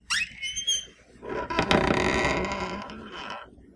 I got this sound from this old pantry squeaking while being opened and closed.